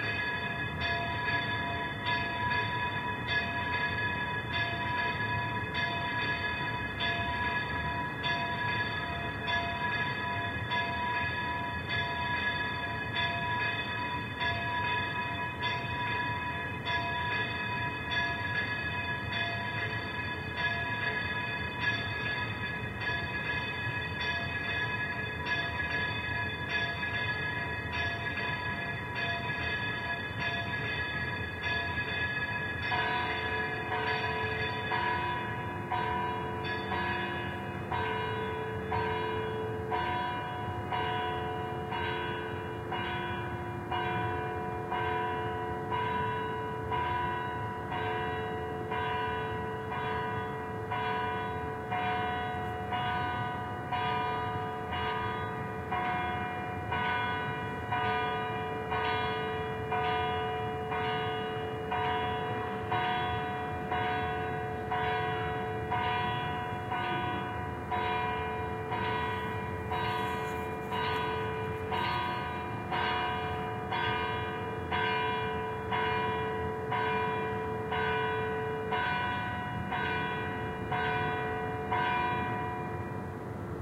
20061014.two.churches
a longish recording of pealing bells in two downtown Seville parish churches: first Iglesia de San Buenaventura, then Iglesia de la Magdalena. The two bell towers were at right but a close building to my left gets the echoes. Recorded at evening from my flat roof with Soundman OKM into Sony MD.
/ campanas de las parroquias de San Buenaventutra y La Magdalena, en Sevilla. Aunque el sonido venía de la derecha hay un edificio a la izquierda que devuelve el eco. Brabado desde mi azotea
field-recording, ambiance, bells, south-spain, streetnoise, city